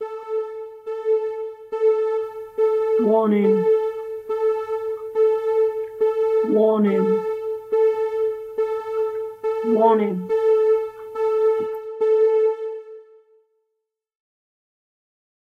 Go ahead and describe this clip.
Warning Sound MH
Created with LMMS and Audacity.
The sound has been created by importing a VeSTige sound from James XIIC in LMMS.
In Audacity, I recorded my own voice and amplified it a little.
It's very simple but good enough for an RPG!
Warning, Alien, Sound, Enemy